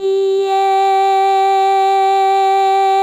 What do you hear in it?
eeeeeeeeeee 67 G3 Bcl
vocal formants pitched under Simplesong a macintosh software and using the princess voice
formants, synthetic, voice